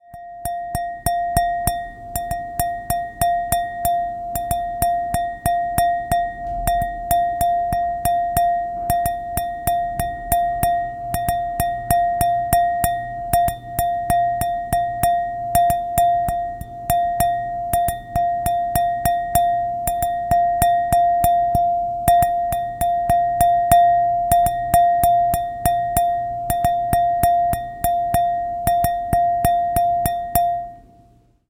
wine glass
Glass hitting glass rhythmically. Recorded using mono microphone and ensemble. No post processing
wineglass, toast, glass, MTC500-M002-s14